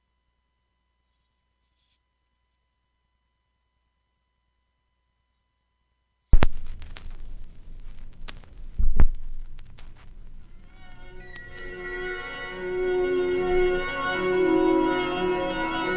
Snippets of digitized vinyl records recorded via USB. Those with IR in the names are or contain impulese response. Some may need editing or may not if you are experimenting. Some are looped some are not. All are taken from unofficial vintage vinyl at least as old as the early 1980's and beyond.